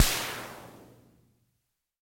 electro harmonix crash drum